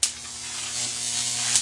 Single arc of electricity going up a Jacob's Ladder.
This was taken from the audio track of a video shoot. Recorded with the internal microphone of a Sony DCR-TRV8 Handycam.
Still frame from the video: